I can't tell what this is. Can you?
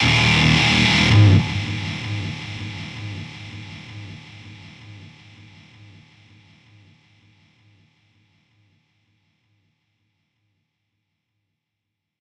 Guitar intune 3 all used in Kontakt sample library